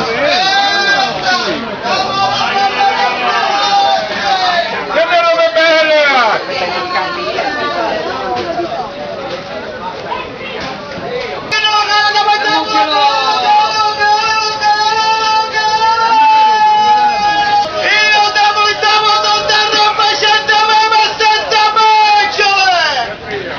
market banniata palermo ballarò vucciria background ambient voci urla
ballar, palermo, vucciria